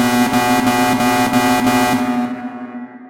A nasty sounding tonal buzzer-style alarm pulsing at about 3Hz (80% duty cycle, I think) with 3D reverberations that make it sound like it is in a very large structure -- totally synthetic, seamless loop. Created from scratch in Cool Edit Pro 2.1.